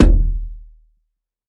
WATERKICK FOLEY - HARM LOW 03
Bass drum made of layering the sound of finger-punching the water in bathtub and the wall of the bathtub, enhanced with lower tone harmonic sub-bass.
bassdrum, foley, kick, percussion